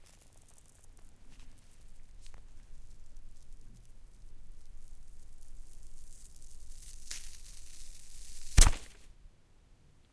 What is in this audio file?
rustle.Foam Rip 2
recordings of various rustling sounds with a stereo Audio Technica 853A
foam, noise, rip, rustle, scratch